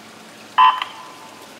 Field recording of a swimming match start beep